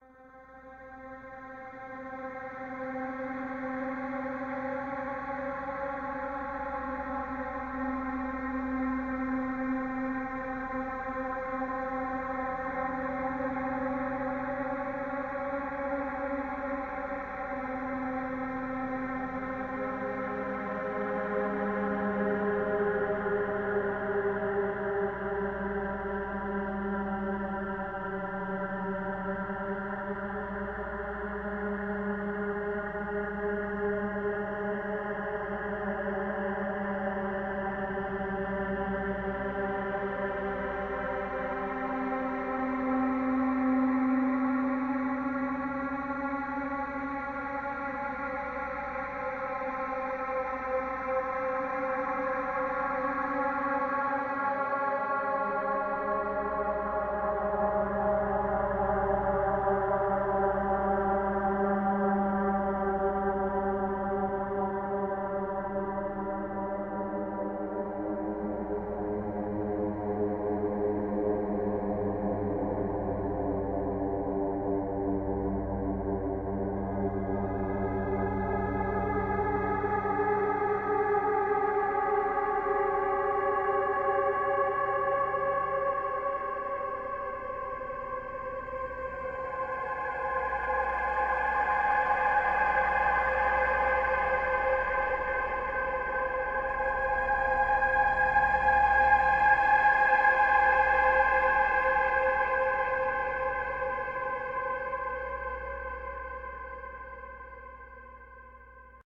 made this with several effects because I don't play keyboards but love drones. Made with Line6 POD no amps, just different filters and delays
binaural, echo, reverb